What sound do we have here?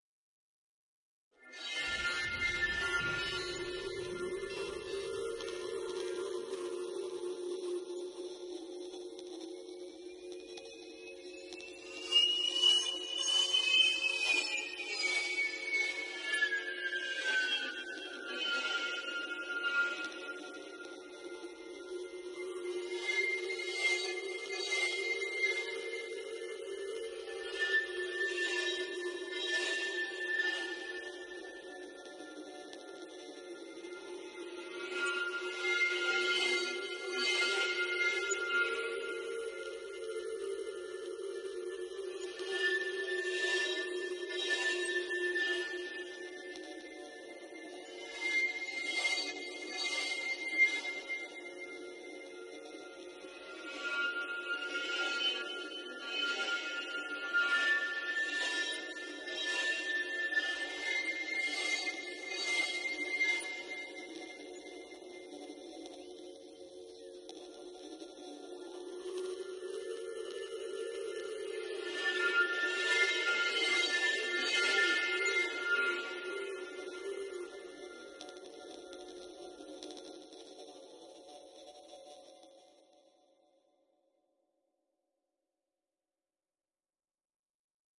Sound is from Reason 6, Combinator Patch Lonely Computer World. Spooky Science Fiction Atmosphere. Metallic sounding noises / echoes over cracking noises.
atmosphere, fiction, horror, noise, science, scifi, sfx, sound-fx, spooky